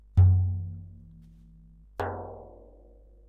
bendir basicStrokes
Basic strokes on a bendir: düm and tek. (recording: 23.12.2011) Musician: Eren Ergen
CompMusic, ottoman, strokes, turkish